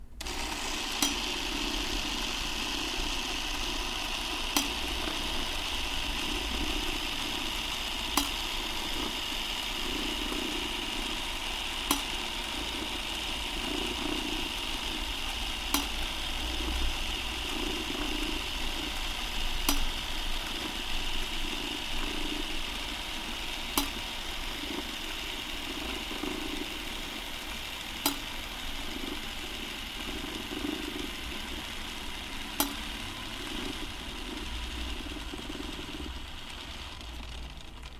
Camera 16mm - Keystone Criterion Deluxe (grave)
Motor da antiga camera 16mm do Zé Pintor rodando. Som captado na casa dele com microfone AKG C568B posicionado no lado que produz um ruído mais grave.
camera, Keystone, 16mm, antiga